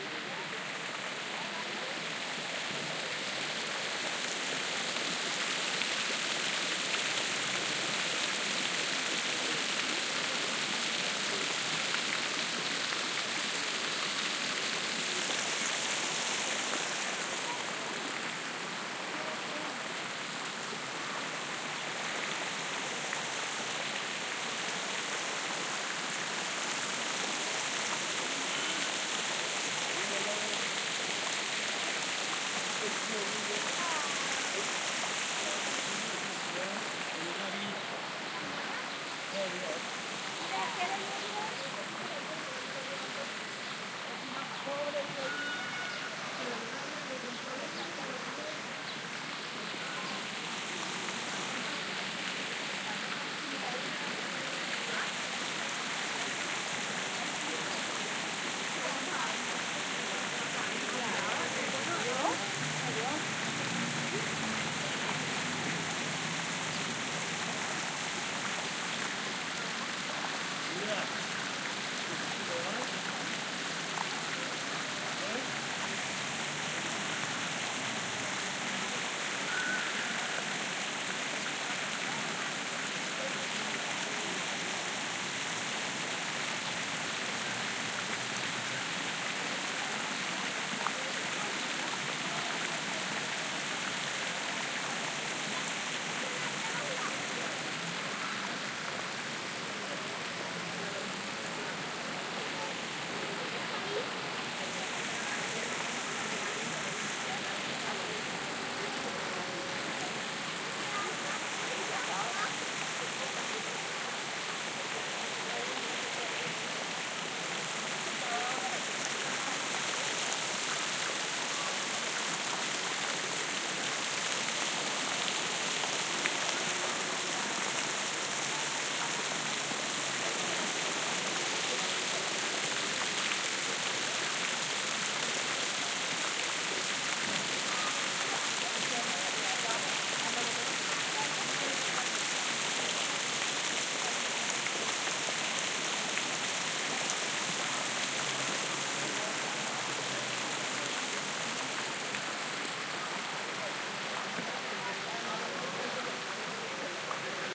fountain with 10-12 jets. Binaural / una fuente con 10-12 chorros
city, water